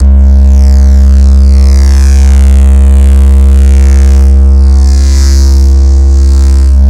ABRSV RCS 045
Driven reece bass, recorded in C, cycled (with loop points)